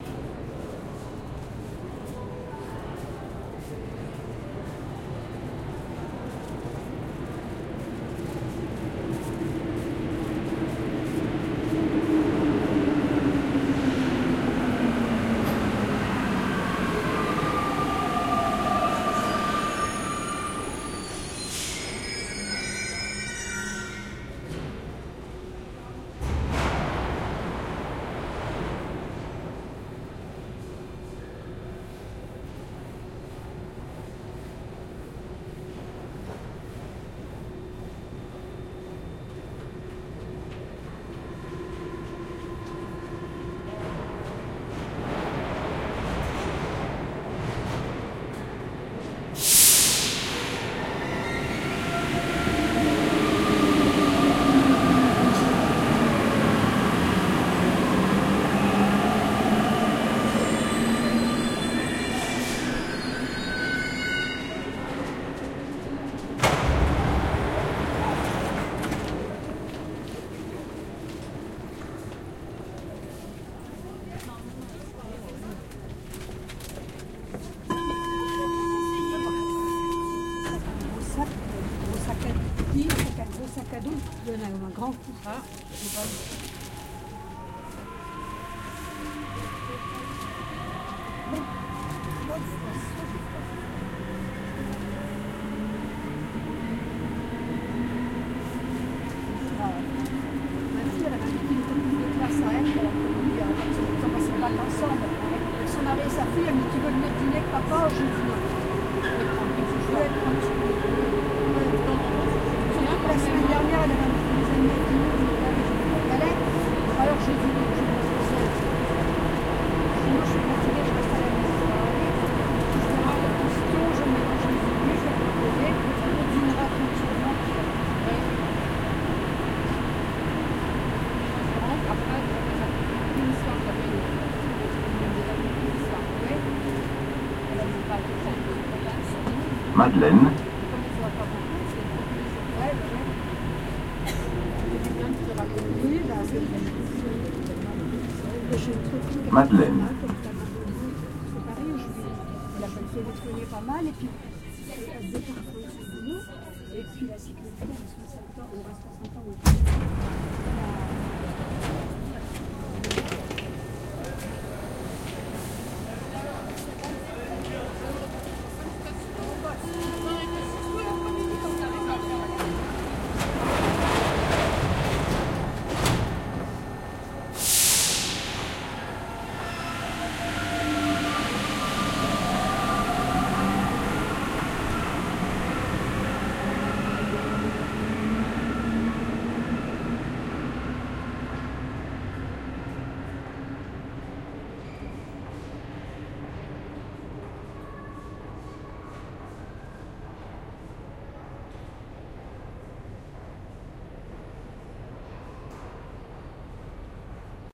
Paris Métro Line 14 ride between two stations (II)
This is a recording of a trip between the Pyramides and Madeleine stations on line 14 of the Paris Métro (subway). Line 14 was opened in 1998 and is fully automated (driverless). It uses MP 89 CA rubber-tired trainsets (heard in this recording).
This recording differs from my other recording of the same line in that you can hear more conversation on board, the departure and arrival of two trains overlap at the beginning, and the stations are different (in particular, the arrival station is not the terminus of the line as in the other recording, so the terminus announcements are absent).
Doors and glass barriers equip the platforms at each station in order to prevent anyone from falling onto the tracks.
Approximate event times in this recording are as follows:
00:07 A train enters the station from right to left on the opposite platform.
00:27 Doors open.
00:41 Door closure warning buzzer sounds, and doors close.
00:49 Brake release.
field-recording, mtro, paris, subway, trains